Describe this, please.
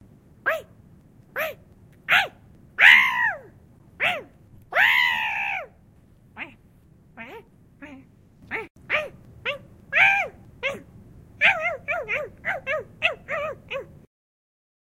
erin gremlin sounds
Silly gremlin-y sounds, I suppose. Created by voice and recorded on an H4n Zoom.
creature, gremlin, growls, noises, yells